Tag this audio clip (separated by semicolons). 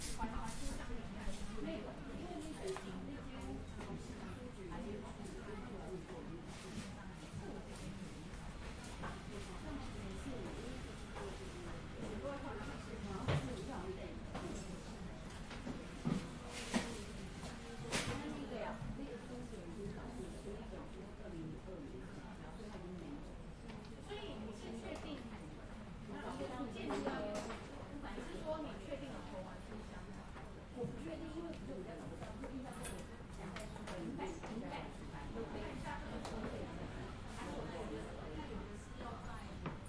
ambience; ambient; field-recording; library; people; soundscape